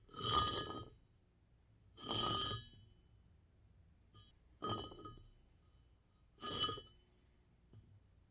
metal pipe 7
Making noise with a 2in galvanized metal pipe - cut to about 2 ft long.
Foley sound effect.
AKG condenser microphone M-Audio Delta AP
effect, foley, pipe, soundeffect